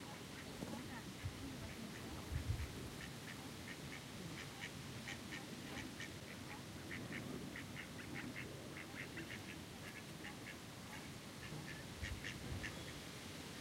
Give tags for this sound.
duck
ducks
field-recording
nature

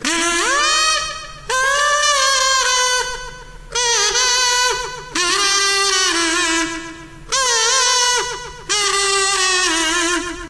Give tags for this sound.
sound
free
improv
sample
kazoo

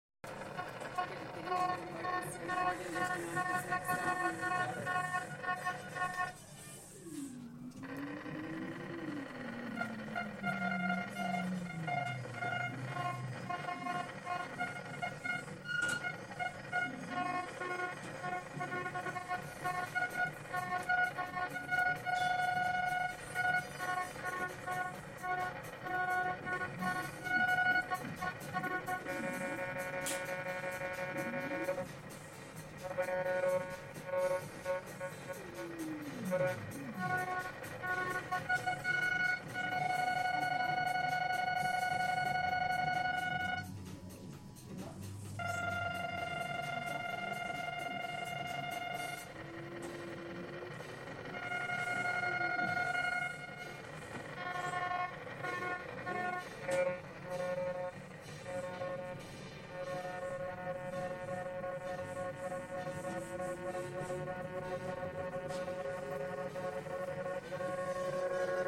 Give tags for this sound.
Digital
Bowing
Interference
Electronic
Bleeps
Random

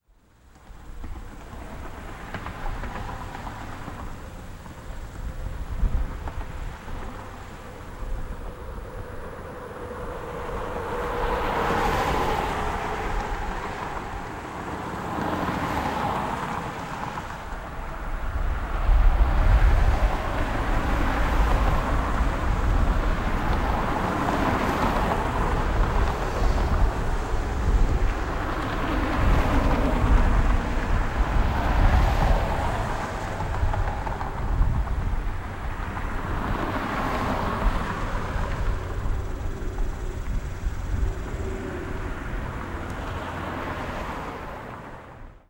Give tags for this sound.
cars
effect
field-recording
soundeffect

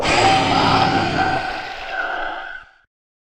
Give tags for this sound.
haunted
horror
Monster
monsters
scary
scream
sfx
spooky
terror
wail

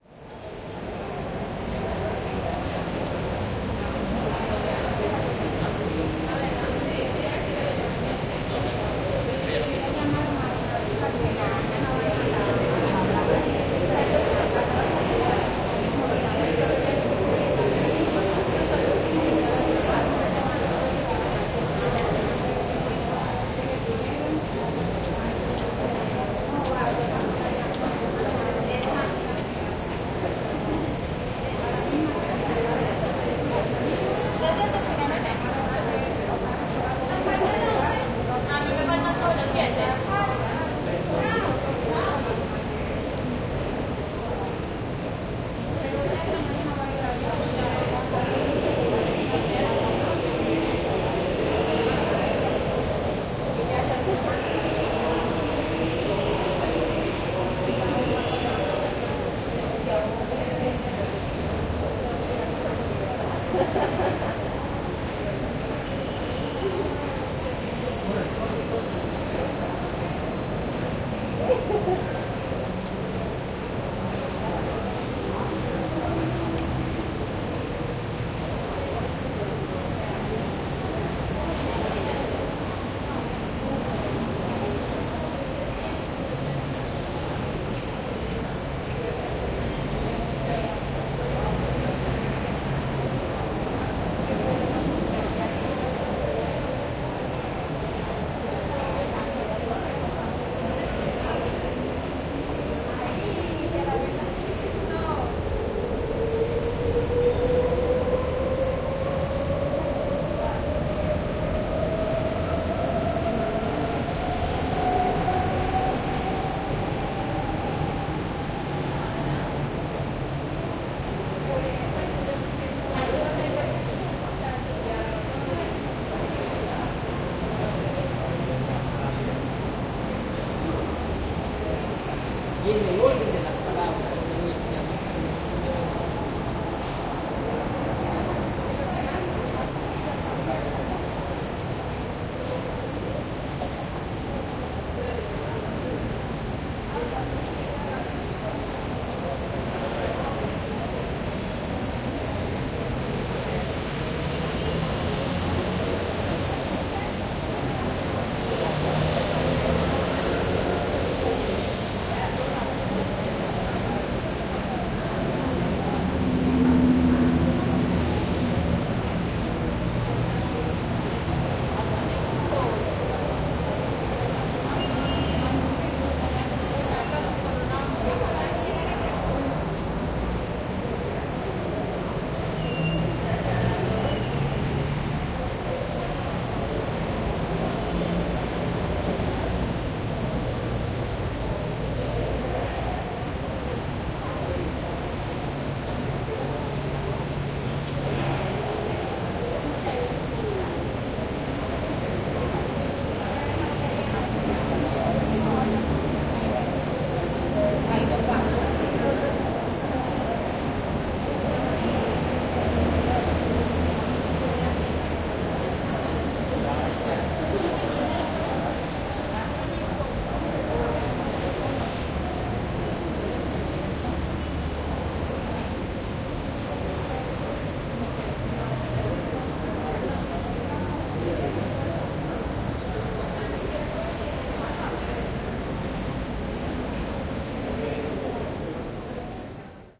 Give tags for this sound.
Crowd
Metro-Outside
Walla
Subway
Ambience
Waiting-Subway

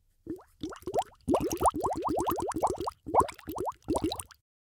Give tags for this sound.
boiling
bubble
bubbles
bubbling
bubbly
liquid
potion
underwater
water

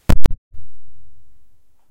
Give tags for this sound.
3
5mm
audiojack
crack
error
glitch
jack
plug-in

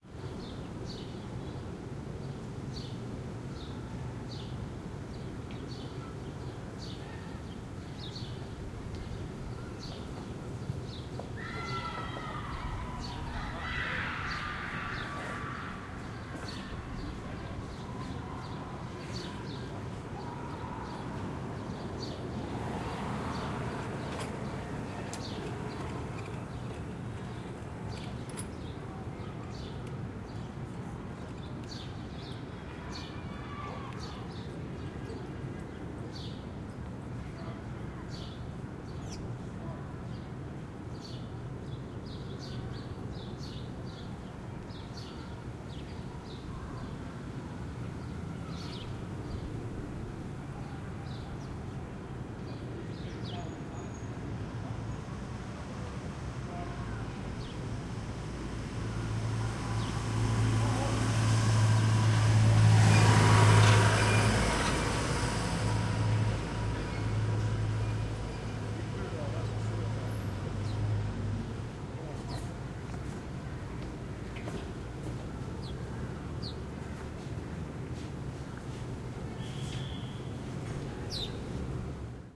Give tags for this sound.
air
airtone
ambiance
ambience
ambient
atmo
atmos
atmosphere
atmospheric
background
background-sound
calm
calma
city
ciudad
general-noise
paz
peace
room-noise
soundscape
suburbio
tone
white-noise

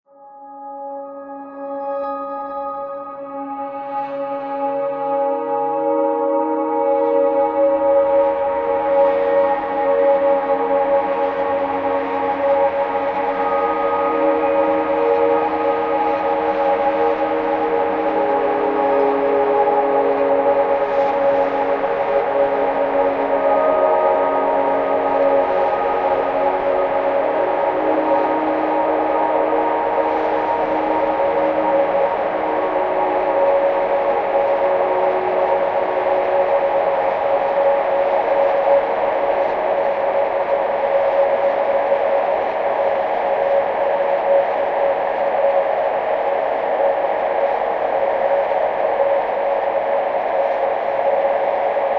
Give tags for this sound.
space
ambient
rhodes

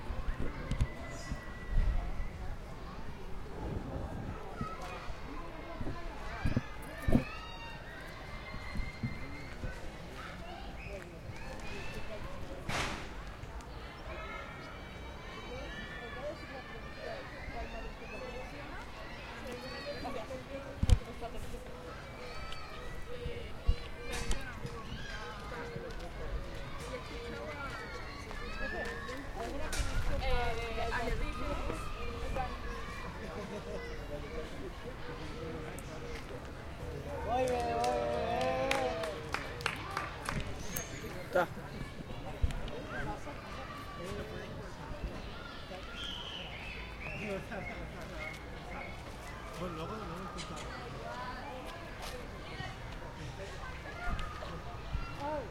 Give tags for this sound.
Humans
Nice

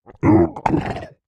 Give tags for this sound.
human
growling
voice
monster
non-verbal
grunting
creature
beast
voiceover
vocal
snorting
slurping

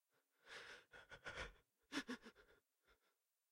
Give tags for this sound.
cry
crybaby

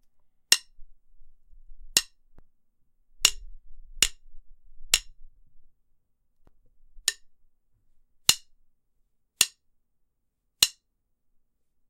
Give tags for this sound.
clank
sticks
thin
wood

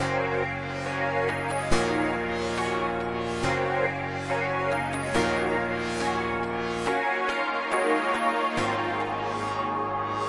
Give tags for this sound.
Trance
synthesizer
Dance
Melodic
Electro
Electronica
House
Synth
Loop

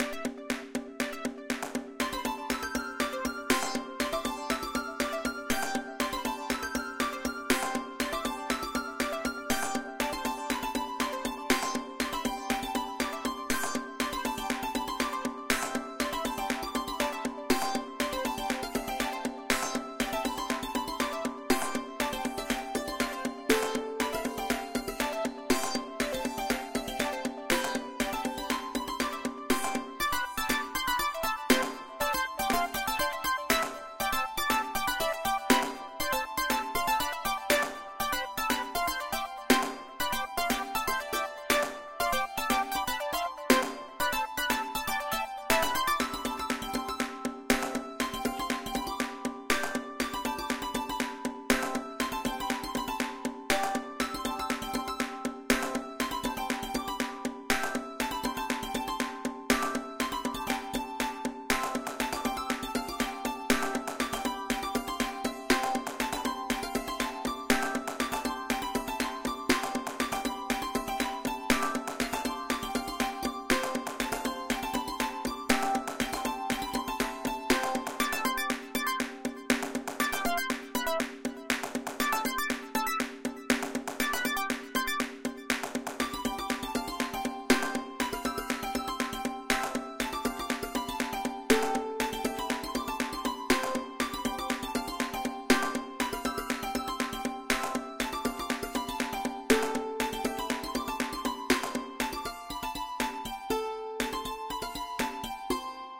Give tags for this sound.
120-bpm
game
loop
music

